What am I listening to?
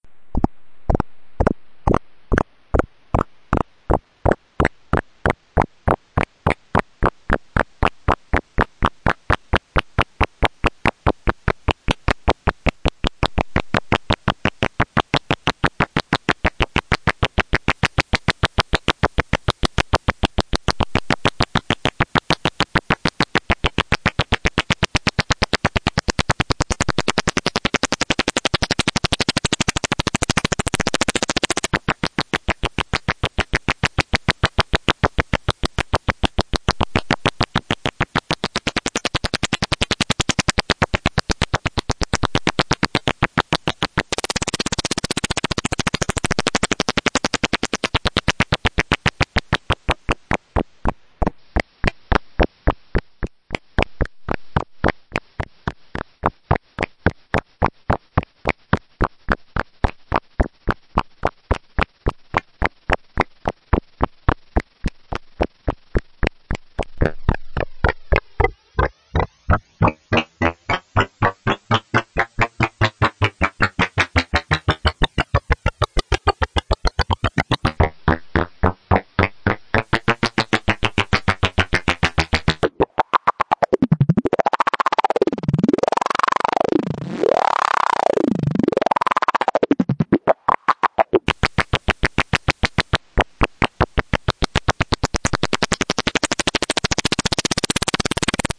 The Tags say it all: Sputter Spit Percussion Splat Squish Juicy Plop.